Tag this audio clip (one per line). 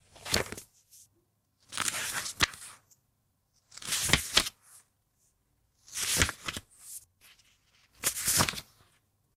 turn
page
flipping
paper
flip
turning
book
books
pages